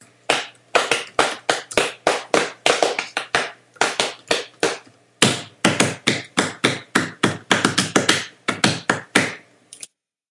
Deck the Halls
song, halls, deck